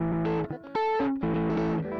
Randomly played, spliced and quantized guitar track.
120bpm, distortion, gtr, guitar, loop